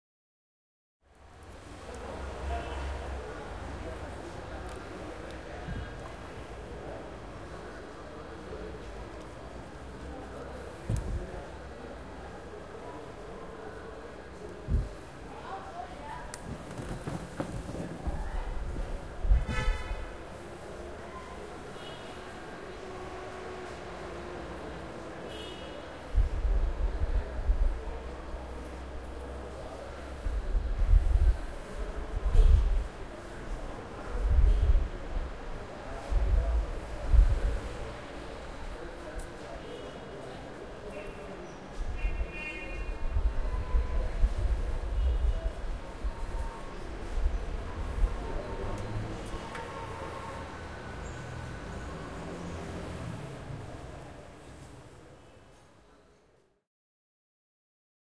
Alexandria Traffic
2014/11/22 - Alexandria, Egypt
Small street. Close avenue.
Wind. Pedestrians. Traffic.
Muezzin call far away.
ORTF Couple